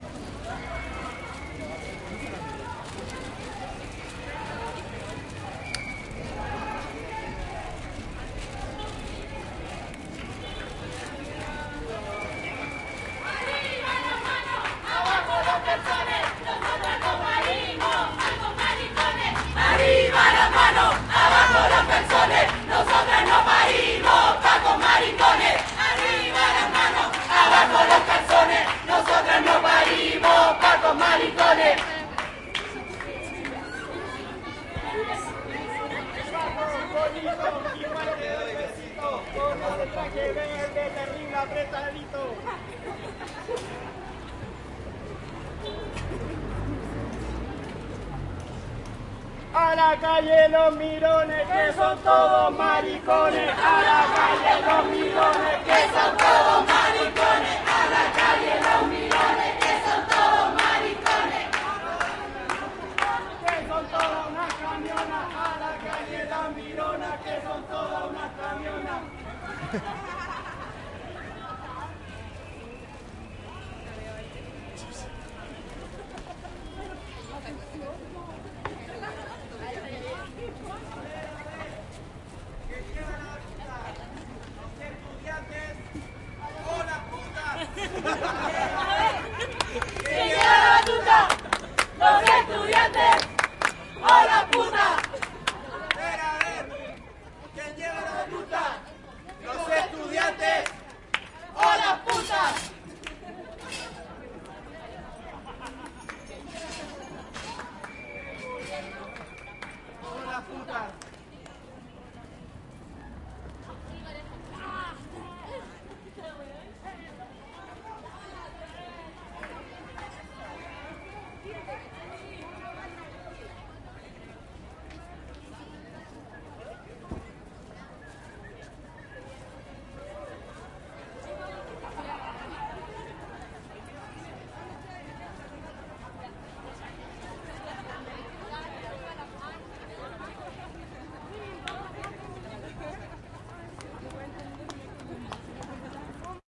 marcha de las putas y maracas 07 - todos son maricones
Arriba las manos, abajo los calzones. A la calle los mirones!. A ver quien lleva la batuta.
calle, chile, crowd, gritos, leonor, maracas, marcha, protest, protesta, putas, santiago, silvestri, street